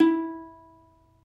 Notes from ukulele recorded in the shower close-miked with Sony-PCMD50. See my other sample packs for the room-mic version. The intention is to mix and match the two as you see fit.
These files are left raw and real. Watch out for a resonance around 300-330hz.
ukulele, string, uke, note